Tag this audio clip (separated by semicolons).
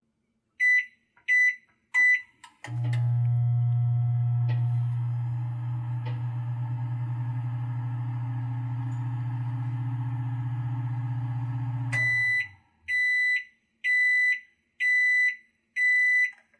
music152; kitchen